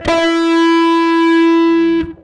Recorded direct with a Peavey Dynabass in passive mode, active mode EQ is nice but noisy as hell so I never use it. Ran the bass through my Zoom bass processor and played all notes on E string up to 16th fret then went the rest of the way up the strings and onto highest fret on G string.